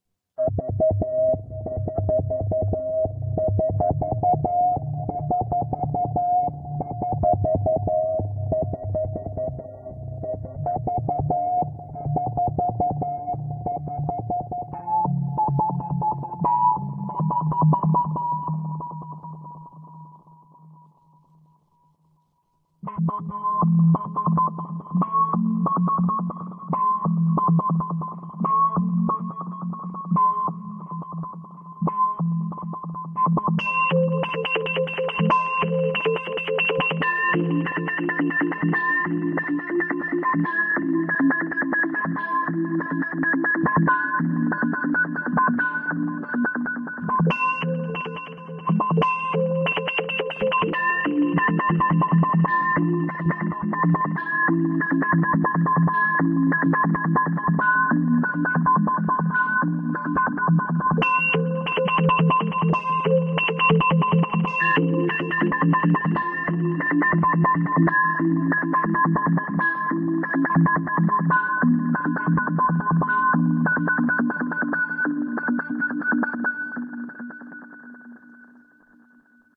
space signals
signals, wave